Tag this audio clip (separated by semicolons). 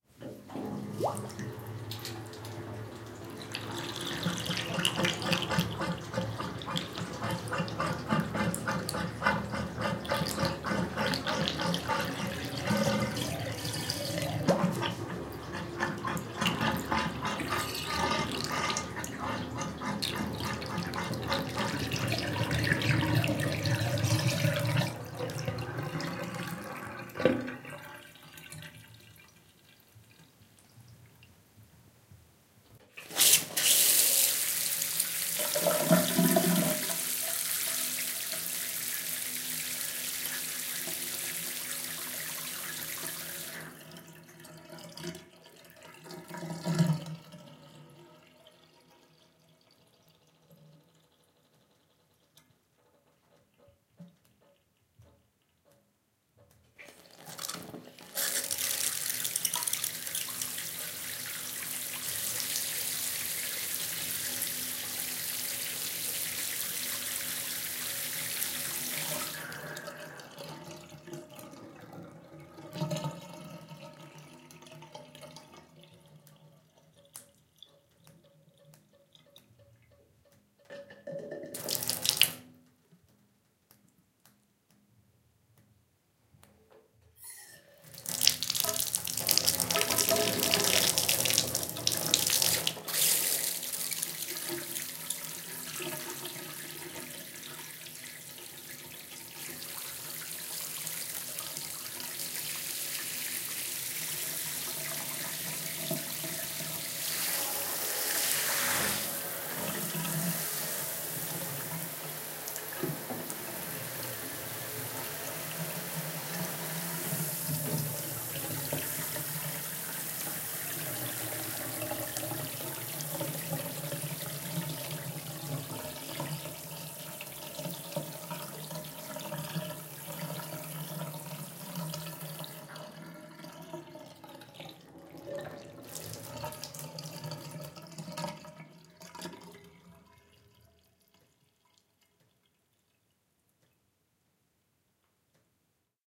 water; drain; faucet; bathroom; bathtub; bath; shower; drip; tub